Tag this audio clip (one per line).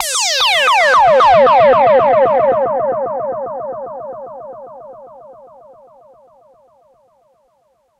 dub
jungle
reggae
siren